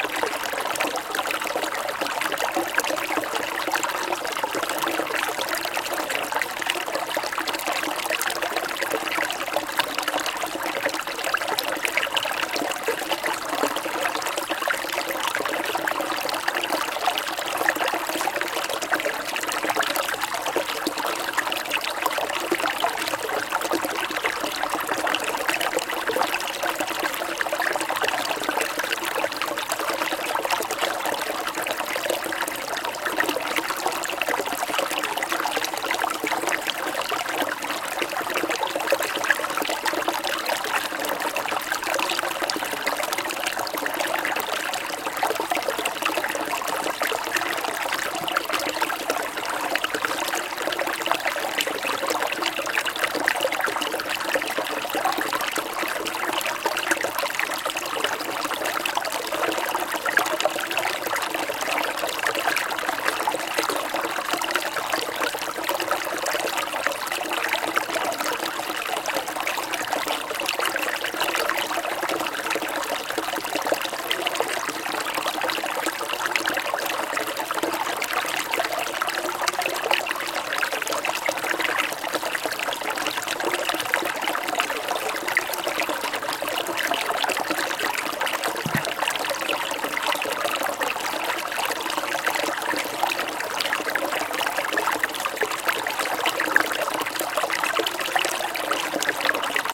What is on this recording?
a stream in scotland